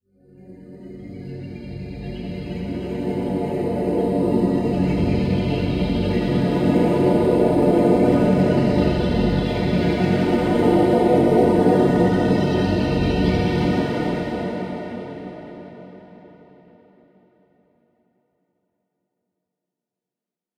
Dramatic pad 3
Deep and dark dramatic pad with alot of disonances. Feel how the evil forces surrounds you...
disonantic, dark, deep, pad